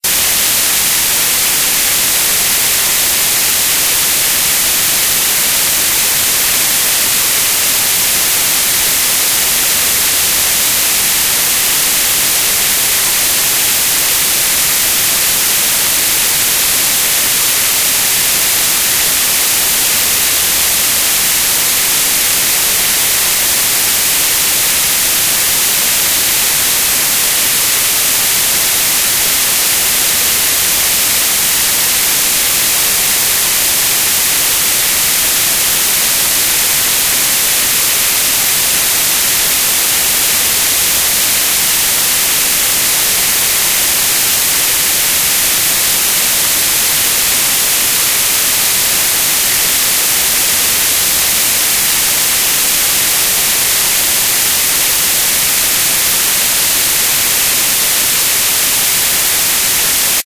No Signal (Original Interference)
Static, Sound Effect
analogic, antigua, conexion, connection, digital, electric, electronic, glitch, no, noise, old, radio, signal, static, television, tv, vhs